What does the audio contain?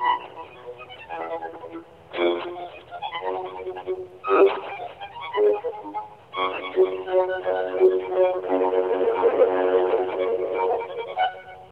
recorded on a phone, mysteriously, as a message on my answering machine. I have no knowledge as to who recorded it, where it was recorded, or whether it came from a live performance or not. All of the segments of this set combine sequentially, to form the full phone message.